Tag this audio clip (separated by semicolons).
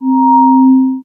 bass; additive; synthesis; metallic; swell; multisample